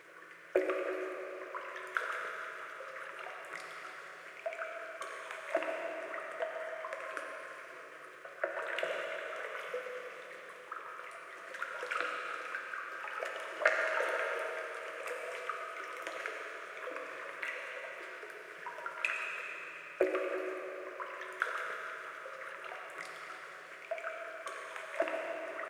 Water Echo
Water splashing and echoing, sounds like it's in a well or something
water, wet, drip, liquid, drop, well, trickle, dripping, echo, splash